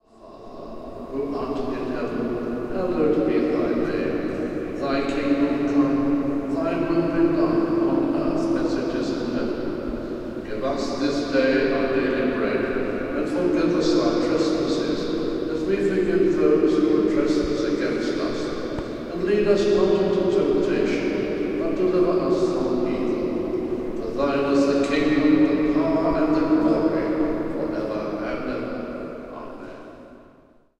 Canterbury fragment 16-3-09
fragment recorded in Canterbury Cathedral Monday March 16 2009 round 11 a.m.
cathedral, natural